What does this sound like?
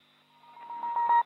Guitar Beep
A weird beep created using a EHX Memory Boy modulating the pitch with a square wave at a fast ratio.
Recorded with an sm57 in front of a Fender Blues Junior.